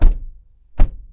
XY recording positioned @ the back seat facing front. Doors close at different times.